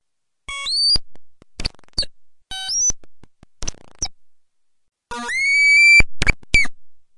Despite how cute the waveforms appear this sound isn't especially pleasant to listen to. Slow down for maximum enjoyment.
funny, glitch, modular, noise